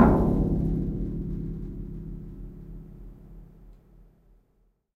Lift Percussion 4
Metallic lift in Madrid. Rough samples
The specific character of the sound is described in the title itself.
knock, lift, metallic, percussion, rough-sample, sound-design, wooden